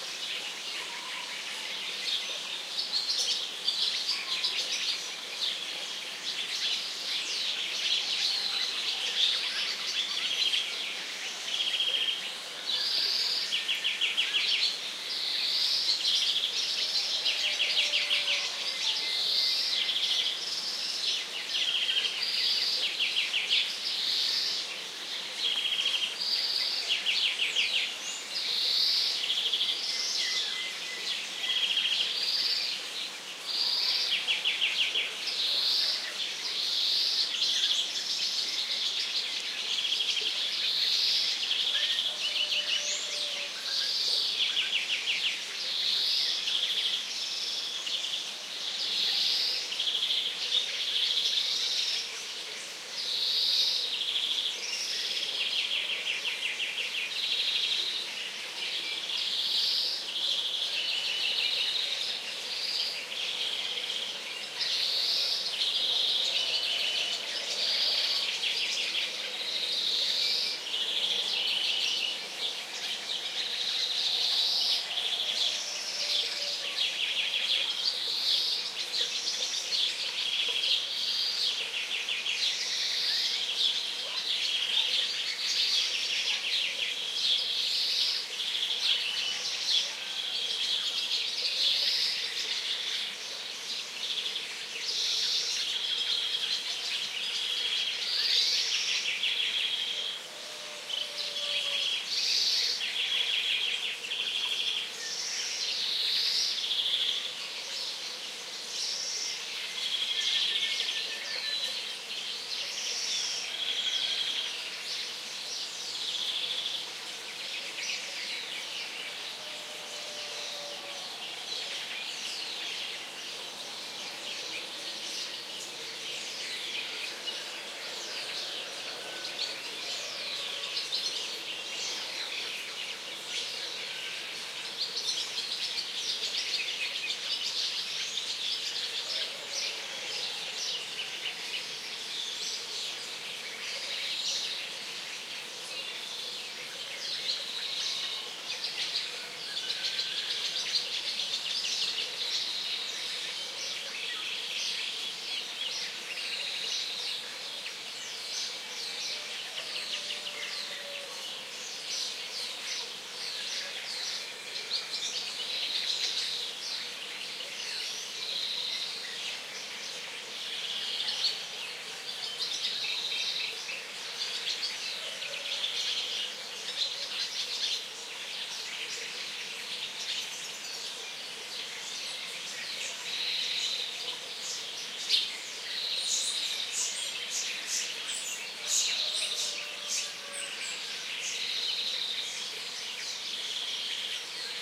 20090501.morning.chorus.02
birds singing in the morning (10:00 am) near Carcabuey, S Spain. Swallow, Starling, Blackbird, House sparrow among others. Murmur of running water in background and occasional passing cars. Sennheiser MKH60 + MKH30 into Shure FP24 preamp, Edirol R09 recorder. Decoded to mid-side stereo with free Voxengo VST plugin